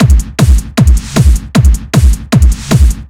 another hard drum loop I made for one of my tracks
loop, hard, percussion, drums, dance